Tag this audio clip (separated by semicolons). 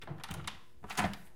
cupboard-door; door; toilet-door